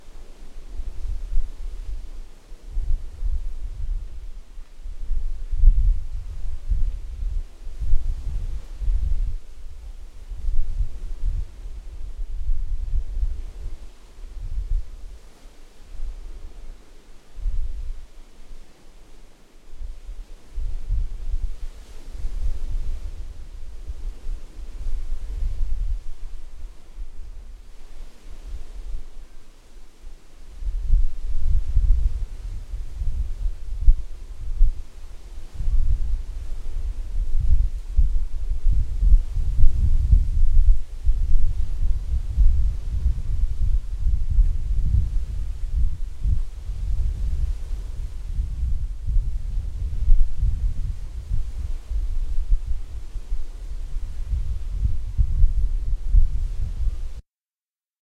Recorded near Pattaya beach far away from Pattaya City with a cheap condenser conference microphone.